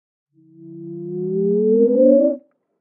Start up
A startup sound for whatever you like
digital, future, glitch, sci-fi, sound-design, sounddesign